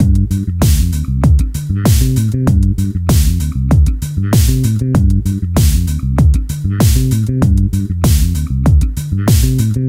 PHAT Bass&DrumGroove Dm 19
My “PHATT” Bass&Drum; Grooves
Drums Made with my Roland JDXI, Bass With My Yamaha Bass
Synth-Loop, Bass, Fender-PBass, Bass-Samples, Hip-Hop, Jazz-Bass, Bass-Groove, Groove, Ableton-Loop, Funk, Synth-Bass, jdxi, Fender-Jazz-Bass, Bass-Loop, Funk-Bass, Soul, Ableton-Bass, Funky-Bass-Loop, Bass-Sample, Beat, Compressor, New-Bass, Logic-Loop, Drums, Bass-Recording, Loop-Bass